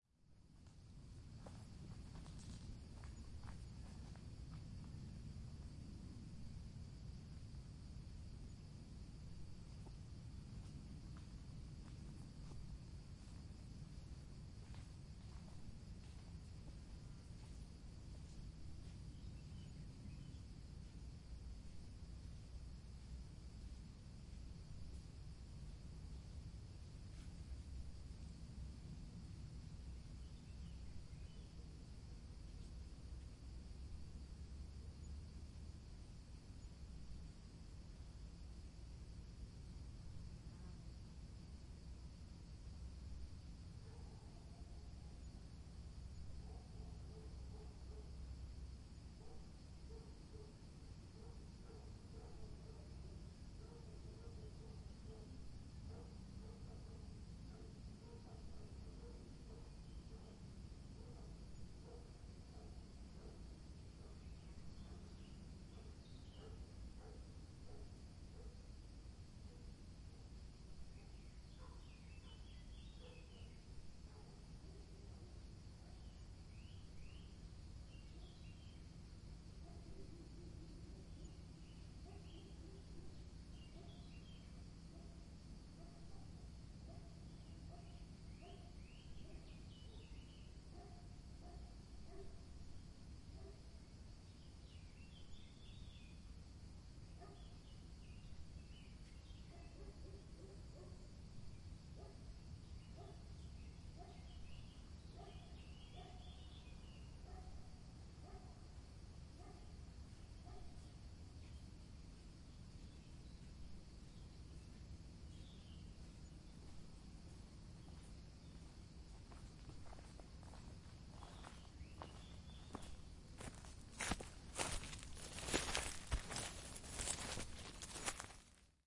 Marata forest ambience - h4n
Field-recording of a Forest in Marata. Some birds sounds and dogs barking can be heard in the distance. Recorded with a Zoom h4n on July 2015. This sound has a matched recording 'Forest ambience - mv88' with the same recording made at the same exact place and time with a Shure mv88.
birds, distant, dogs, field-recording, forest, marata, nature, zoom-h4n